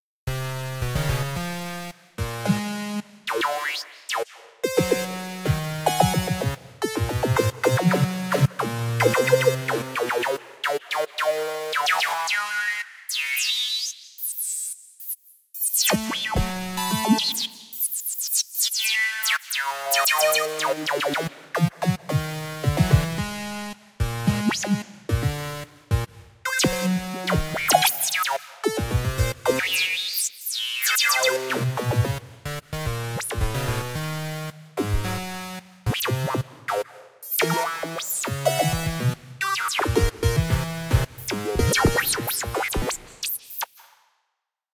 I recorded myself screwing around the Malstrom synth. Extensive filter tweaking is evident.